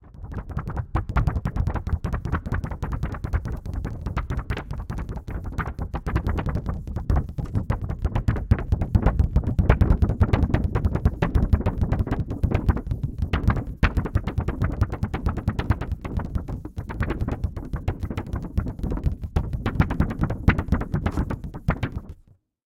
Tapping of hand on plastic sheet film. Recorded with mono microphone and ensemble. No post processing